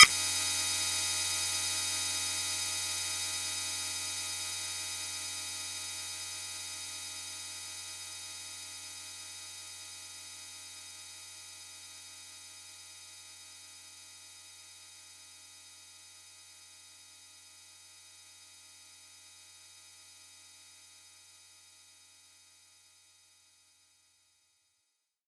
PPG 001 Dissonant Weirdness E5
This sample is part of the "PPG
MULTISAMPLE 001 Dissonant Weirdness" sample pack. It is an experimental
dissonant chord sound with a lot of internal tension in it, suitable
for experimental music. The sound has a very short attack and a long
release (25 seconds!). At the start of the sound there is a short
impulse sound that stops very quick and changes into a slowly fading
away chord. In the sample pack there are 16 samples evenly spread
across 5 octaves (C1 till C6). The note in the sample name (C, E or G#)
does not indicate the pitch of the sound but the key on my keyboard.
The sound was created on the PPG VSTi. After that normalising and fades where applied within Cubase SX.
dissonant, ppg, multisample